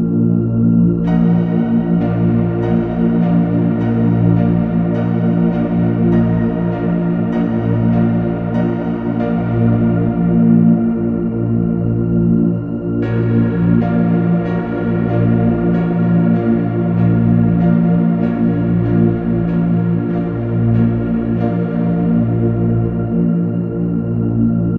ALIEN MUSIC CLIP DONE ON KEYBOARD FROM FINAIR BY KRIS KLAVENES
dramatic, horror, movie, terror, thrill